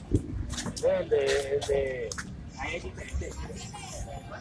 Registro de paisaje sonoro para el proyecto SIAS UAN en la ciudad de santiago de cali.
registro realizado como Toma No 04- voces 3 ve el de el de plazoleta san francisco.
Registro realizado por Juan Carlos Floyd Llanos con un Iphone 6 entre las 11:30 am y 12:00m el dia 21 de noviembre de 2.019